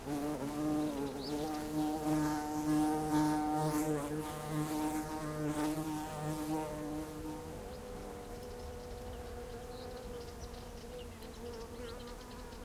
20080226.bee.rbd.06
spring ambiance, with birds singing and a large bee that flies near the mics. Shure WL183 capsules into Fel preamp, Edirol R09 recorder
field-recording, south-spain, birds, spring, bee, buzz